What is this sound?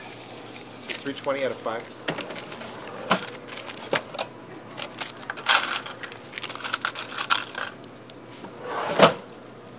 cash register 1
This is a recording of the cash register at the Folsom St. Coffee Co. in Boulder, Colorado. The clerk enters an order, and makes change.
cash-register, coffee, shop